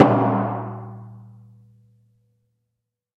Metal Drum 11
industrial, sound, container, mantra, vibration, drums, percussive, shamanic, metal, chanting, shaman, drum-loop, percussion-loop, healing, 130-bpm, percussion, chant, drum, journey